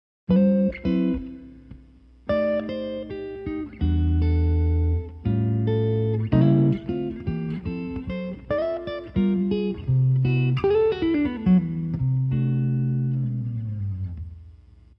more Jazz guitar
chords, guitar, jazz